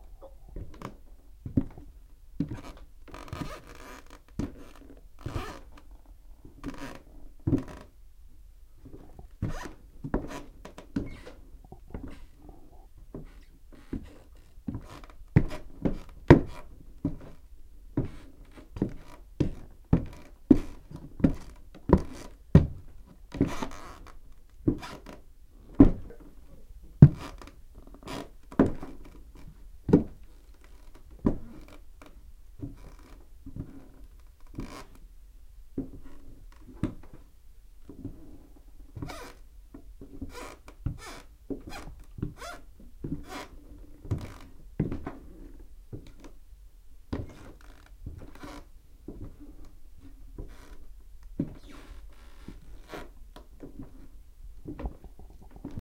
18 Footsteps on wood; present; near; second floor
Footsteps on wood; present; near; second floor
squeaky